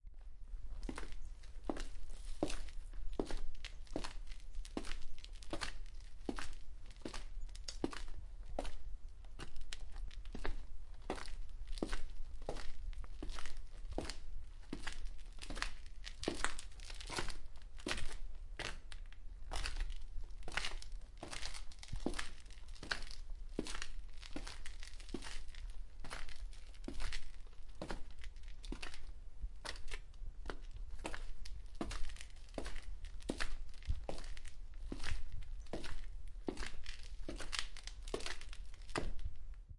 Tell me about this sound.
Footsteps, Concrete, A
Raw audio of hard-heeled footsteps on some dusty concrete foundations to a house.
An example of how you might credit is by putting this in the description/credits:
The sound was recorded using a "H1 Zoom recorder" on 10th February 2016.
foundation, concrete, step, footsteps, dusty, hard, footstep, steps, heel